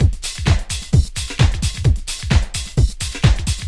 duppyHouse02 130bpm
Funky house/dance beat with open hi-hats and old school funk style drums.
130bpm, breakbeat, funky, loop, beat, dance, break, drum, house